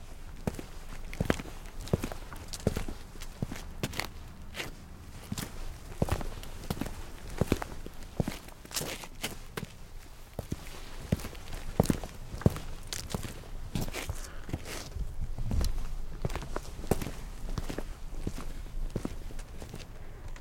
male walking on concrete